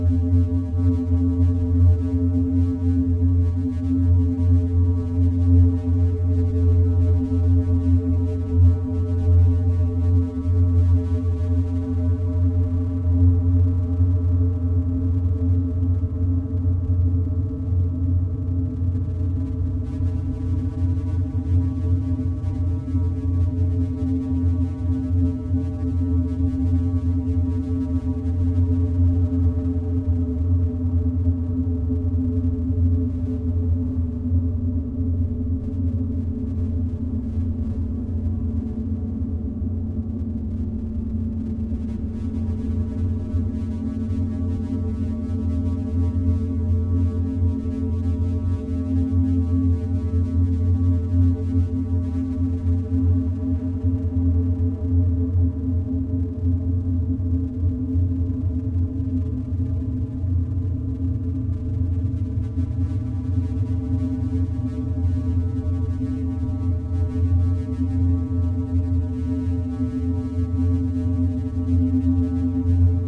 archi soundscape electric1
Using instances of Surge (synth) and Rayspace (reverb)
Sounds good for engine rooms.
ambiant, ship, atmosphere, ambiance, ambience, soundscape, drone, engine, ambient, electricity, electric